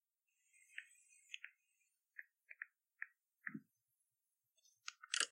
This is kinda low quality, but here is some iPhone unlock and lock noises. I tried my best to reduce background noise and bring out the beeps and taps, but it is kinda hard so....
beep, iphone, lock, phone, tapping, unlock, unlocking